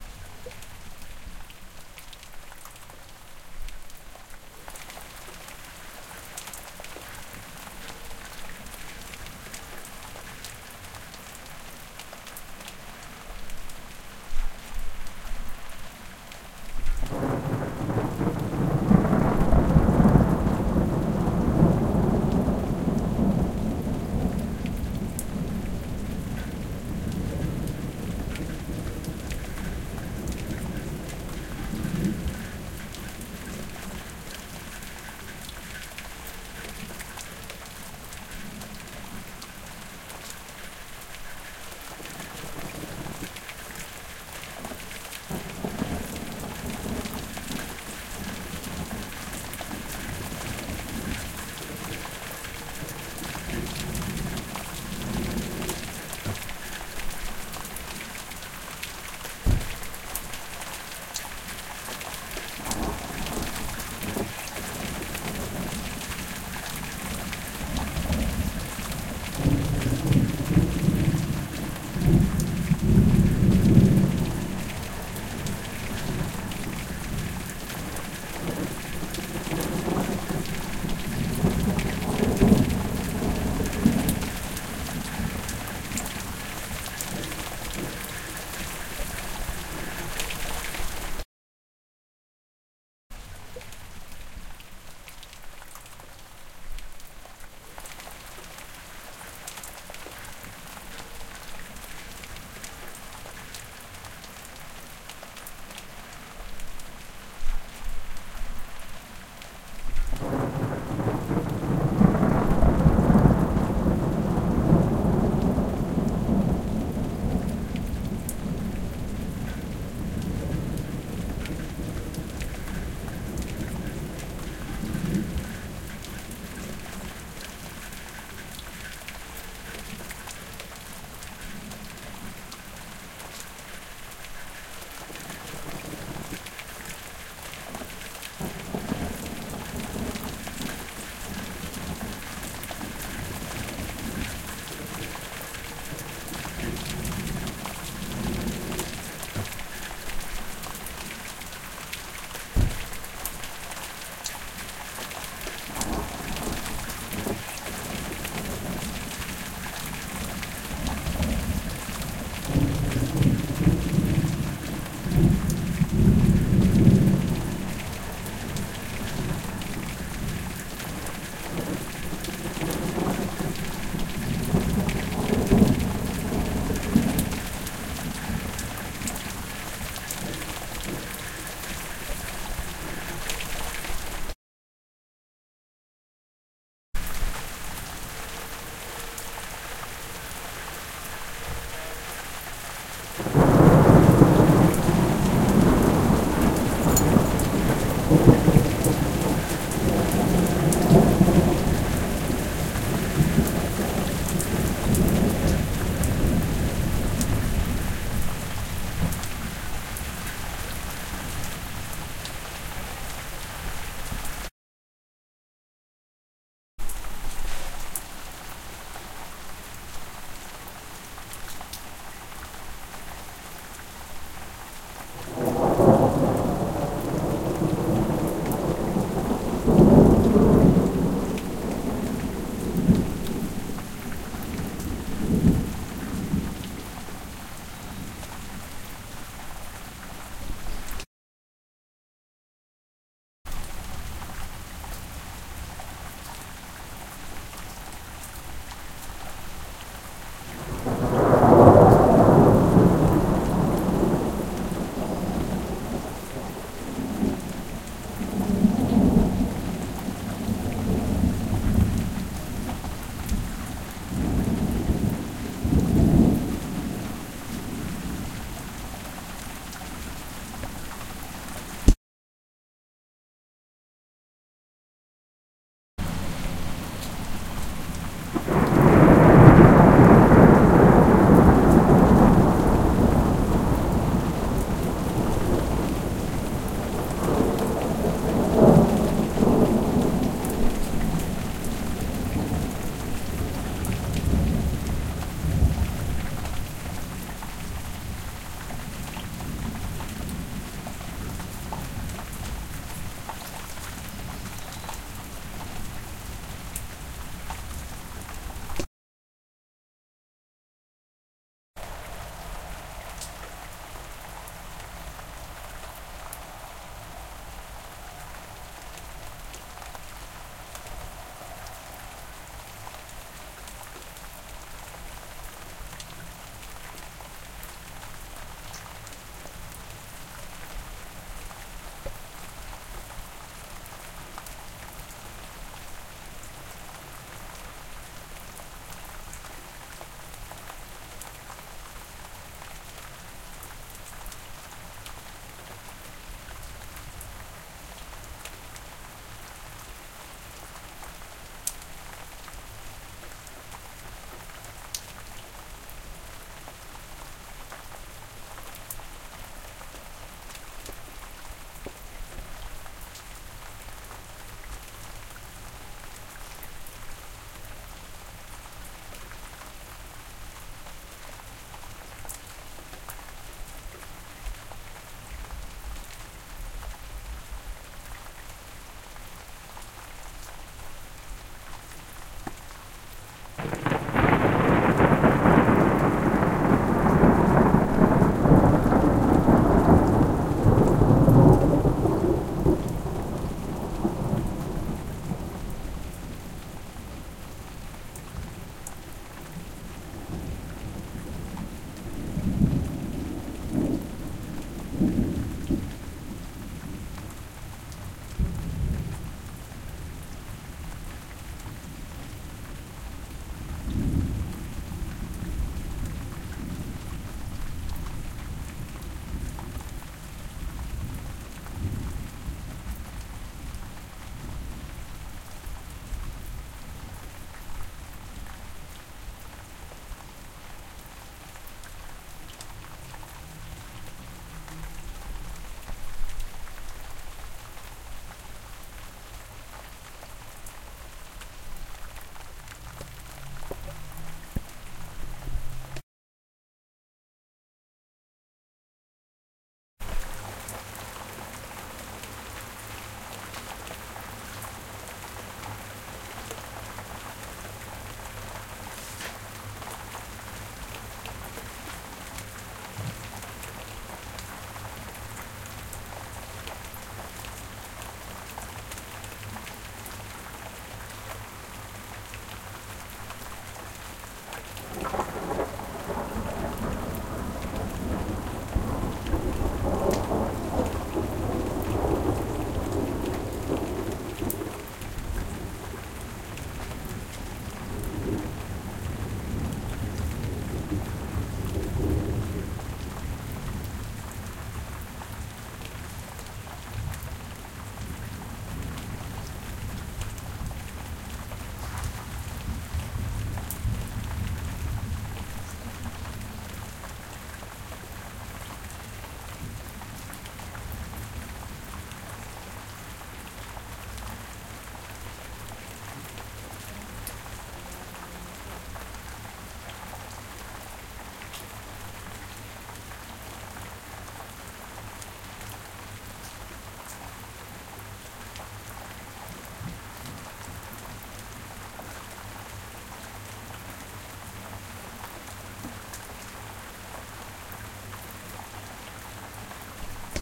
Various Rain and Thunder Sounds